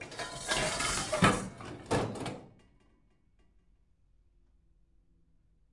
pots n pans n trashcan 02
pots, pans, and a metal trashcan banging around in a kitchen
recorded on 10 September 2009 using a Zoom H4 recorder